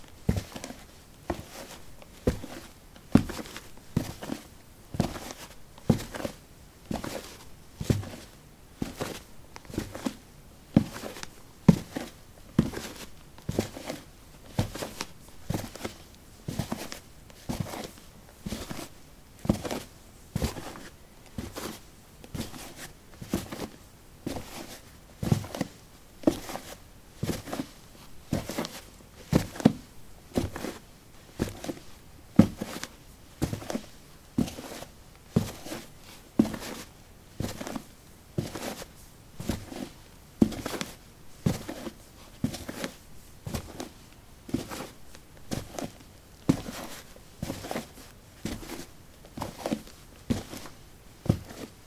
soil 15a darkshoes walk
Walking on soil: dark shoes. Recorded with a ZOOM H2 in a basement of a house: a wooden container placed on a carpet filled with soil. Normalized with Audacity.
walking, step, footsteps, walk, footstep, steps